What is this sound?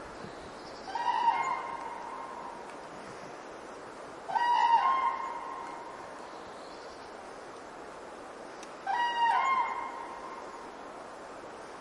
I heard and saw cranes at Målsjön in Kristdala,Sweden,it`s a bird-lake.
I did some recordings in 2nd of april.
microphones two CM3 from Line Audio
And windshields from rycote.